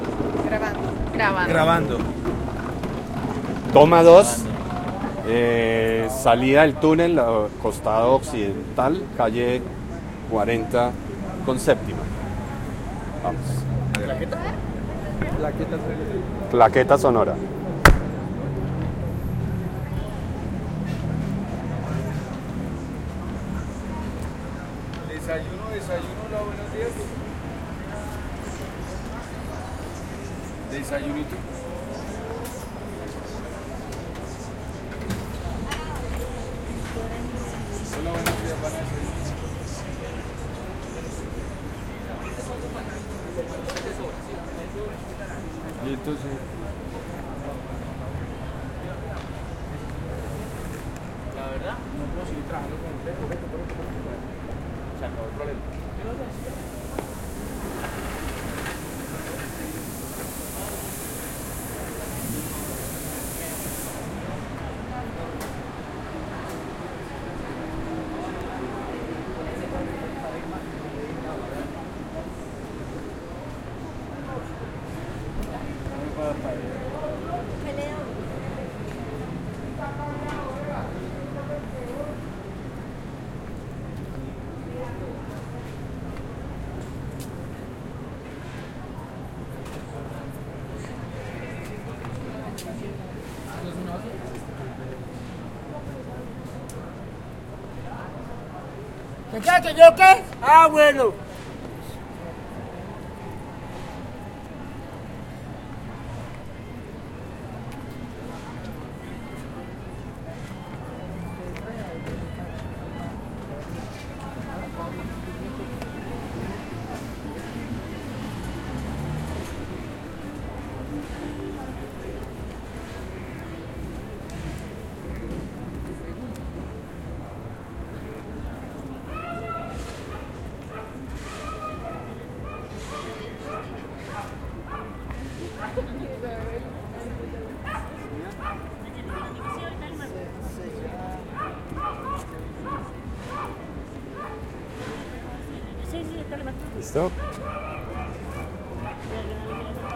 toma-02 roberto cuervo
Field recording of Bogota city in Chapinero locality, around 39 and 42 street, between 7th end 16th avenue.
This is a part of a research called "Information system about sound art in Colombia"
PAISAJE-SONORO, SONIDOS-PATRIMONIABLES, SOUND-HERITAGE, VECTORES-SONOROS, SOUNDSCAPE